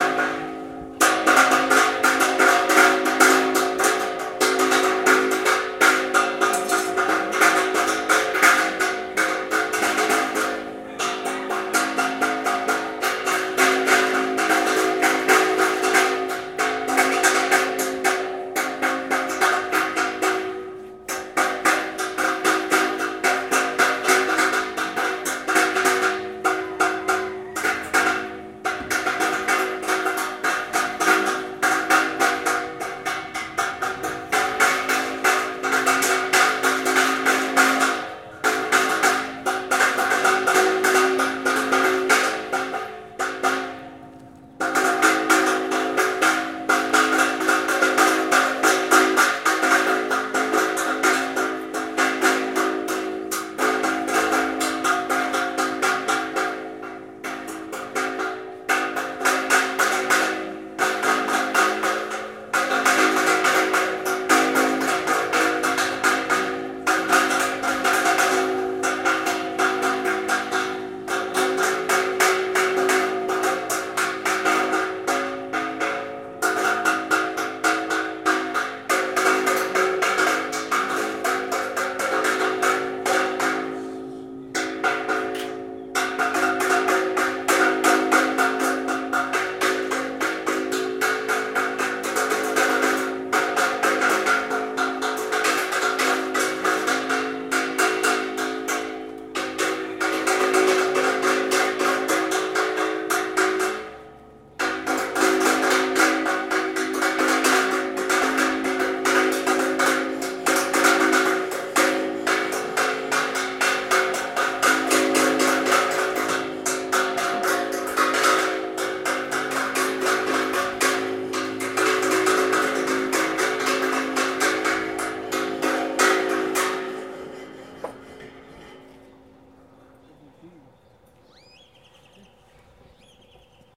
sound of some blacksmiths working. sonido de herreros trabajando.